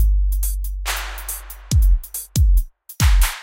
Has a style closest to dub step/hip hop. 140 bpm. Can be used with On Road loop3